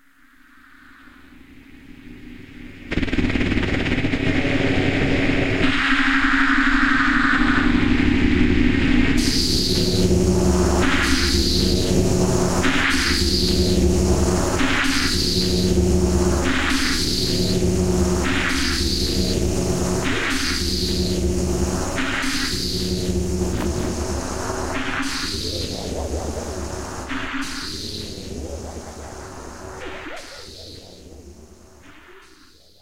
Alien Craft
A large alien cyborg craft.
alien, factory, industrial, loop, machine, machinery, mechanical, noise, robot, robotic